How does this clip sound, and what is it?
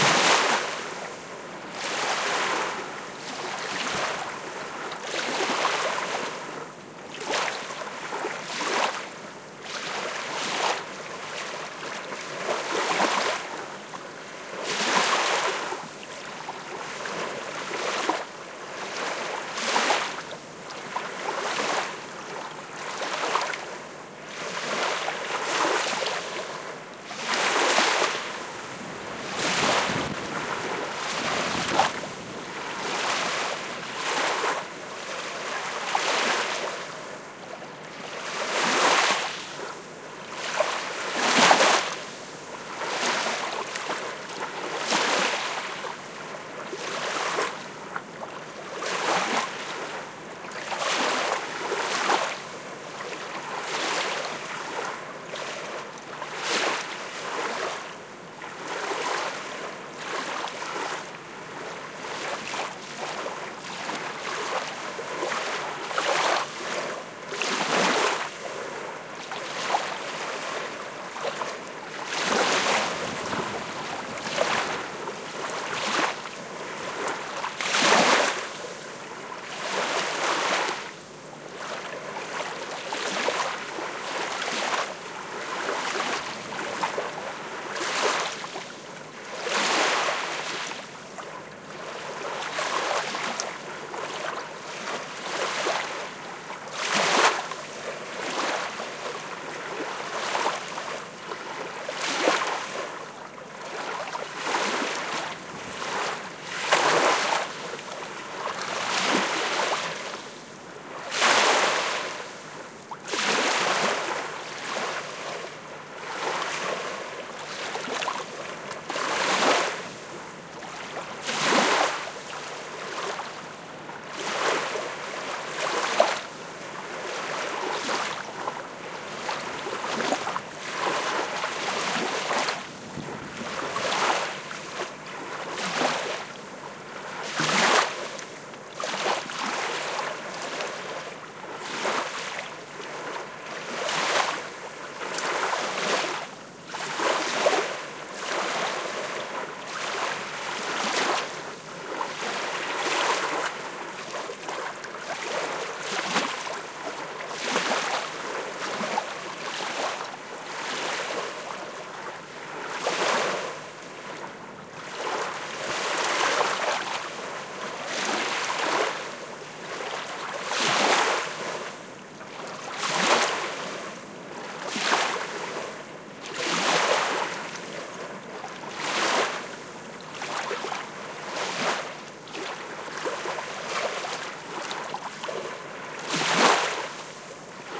20130405 soft.waves.13
soft waves splashing on the beach at Pehoe Lake (Torres del Paine National Park, Chile)